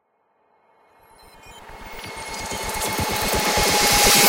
this series is about transition sfx, this is stacked sound effects made with xsynth,dex and amsynth, randomized in carla and layered with cymbal samples i recorded a long time ago
hit, noise, white, woosh, impact, riser, sfx, cymbal, crash, transition